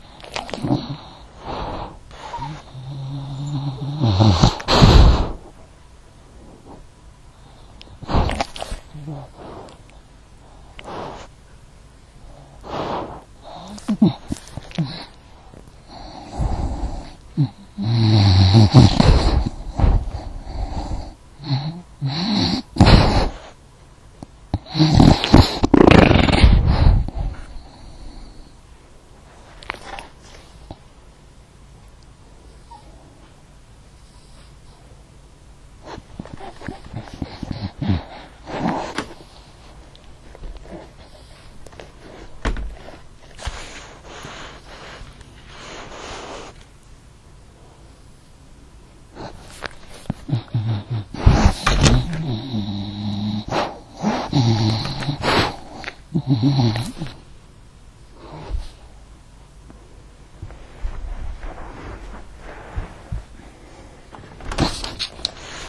My English Bulldog sniffing the microphone on the digital recorder
bulldog, bulldogge, dog, sniff, snort